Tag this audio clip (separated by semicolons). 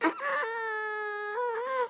666moviescreams; animal; cat; pet; scream